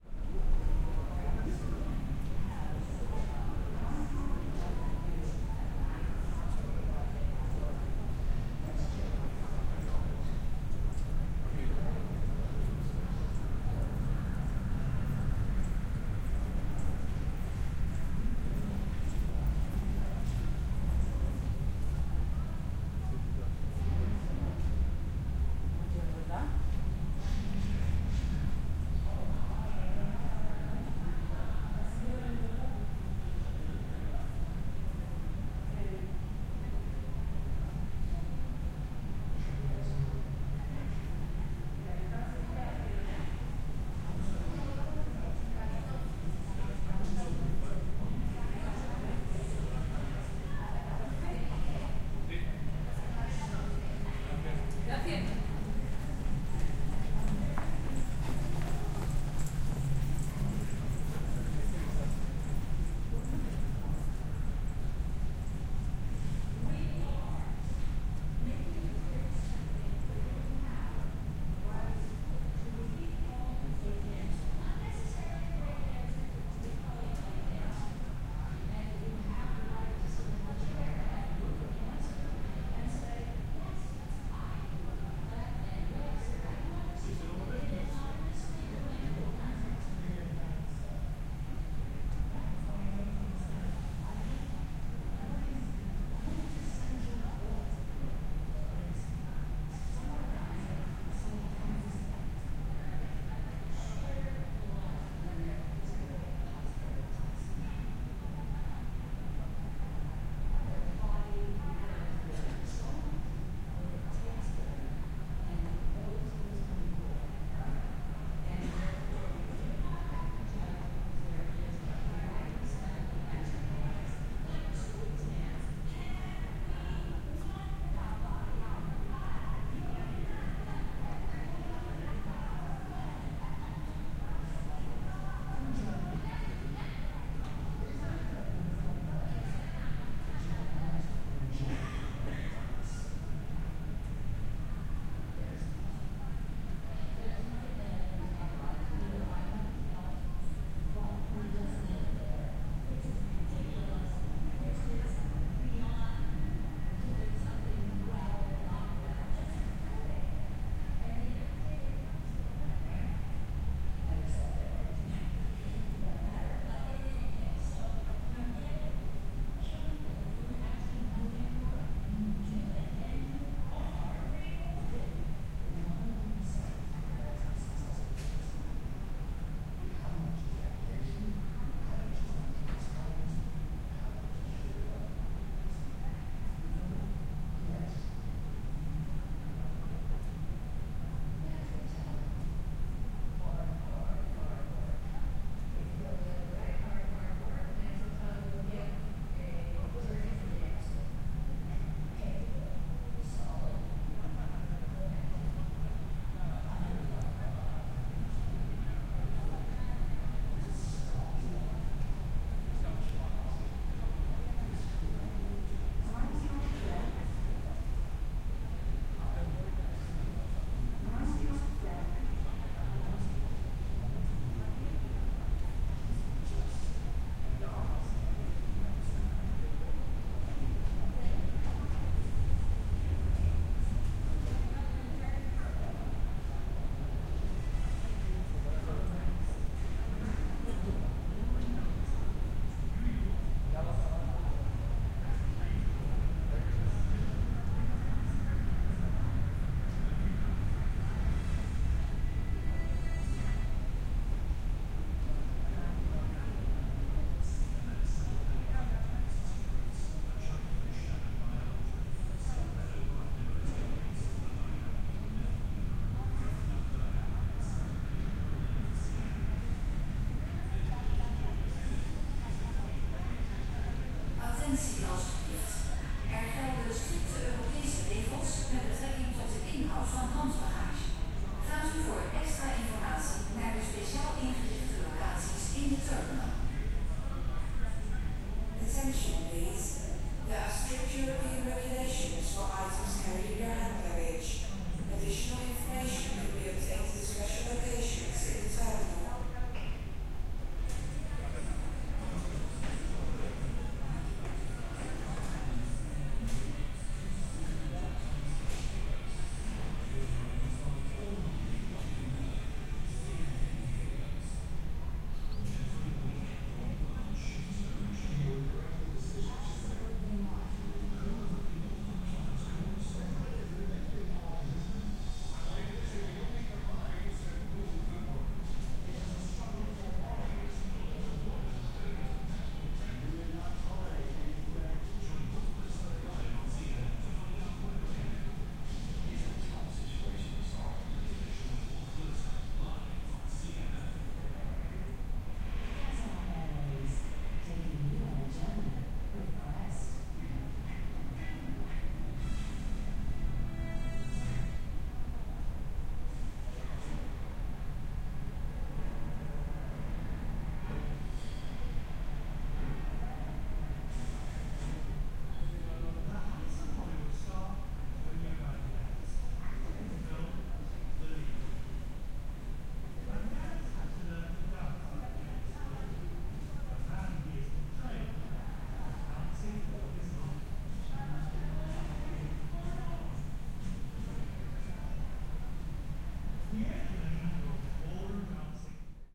Recording of the quiet ambience in a waiting hall in Sshiphol airport. You can hear some people speaking, the loudspeakers and an English TV show. M-Audio Microtrack with it's own mic.